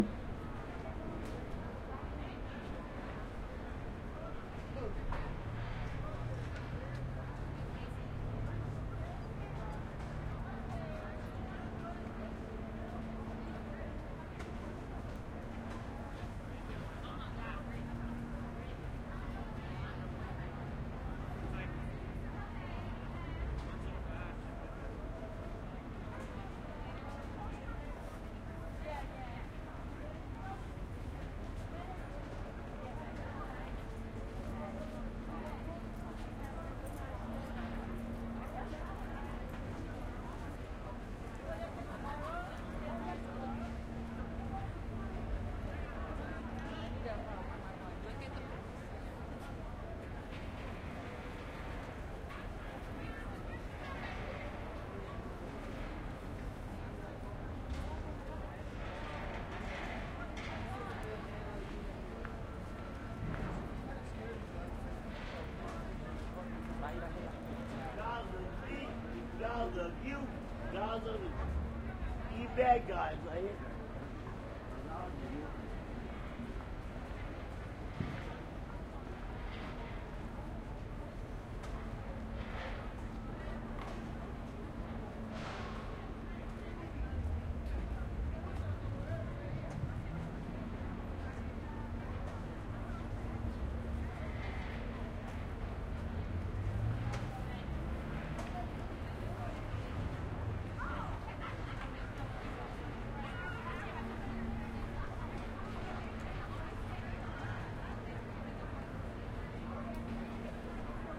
Farmers Market by Wilshire Metro Station
More of me recording the activity of a Farmer's Market by Wilshire Station in Los Angeles, close to Koreatown.
Angeles, City, Crowd, Farmers, Los, Market, People, Street, Talking, Voices, Wilshire